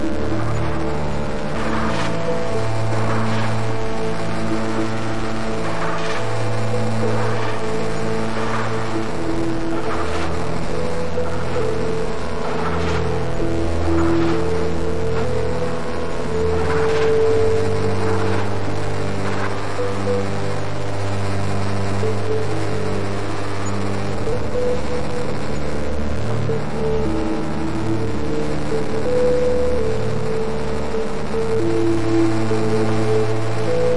Cloudlab-200t-V1.2 for Reaktor-6 is a software emulation of the Buchla-200-and-200e-modular-system.
6, Software, Instruments, V1, Reaktor, 2, Runs, Buchla, That, 200t, Cloudlab, Native, Emulation